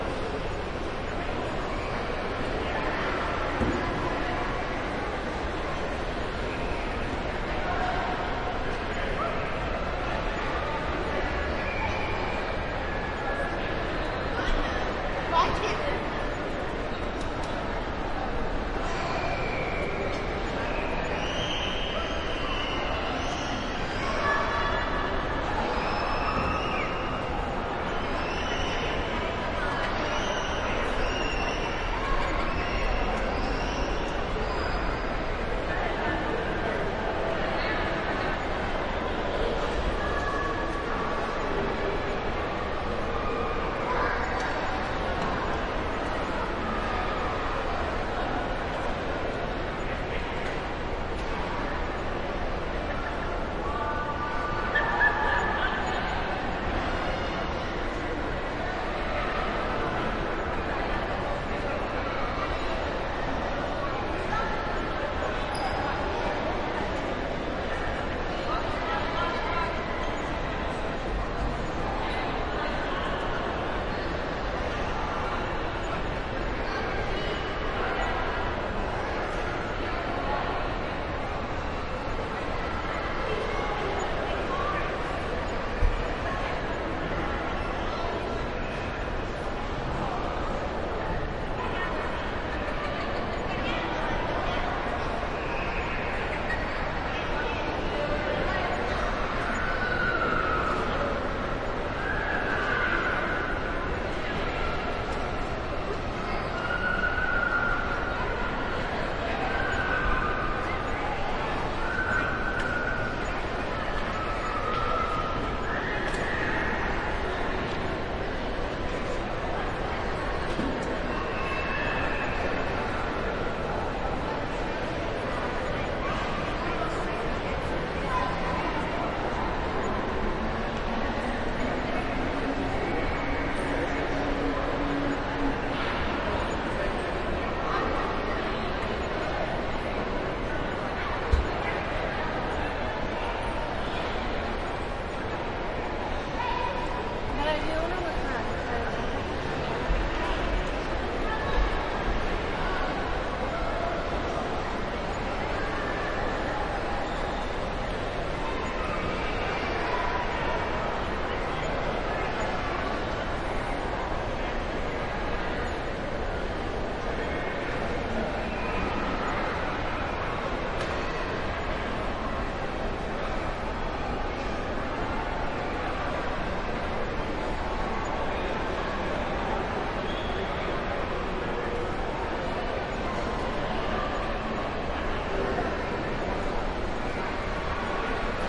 Mall, Next to the Coffee Shop
Shoppers filter past a nearby coffee stand.